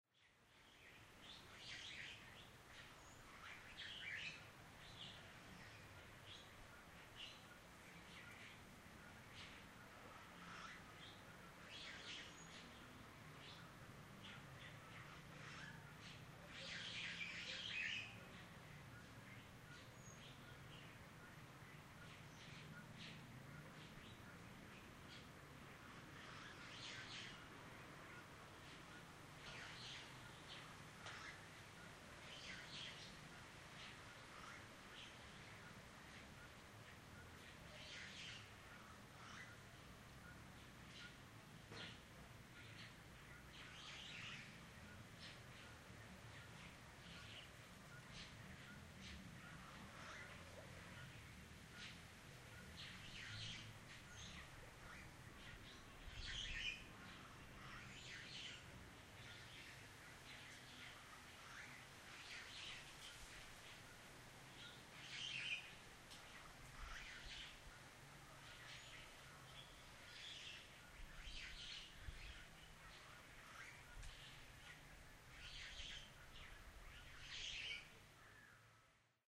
Birds Morningforest
Recorded on the field in the morning
Birds, Morningforest